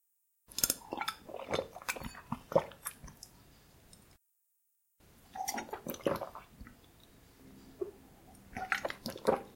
Drinking water.
Thank you!